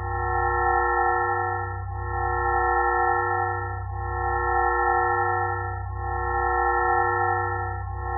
Mix of sinus waves created whit Super Collid er.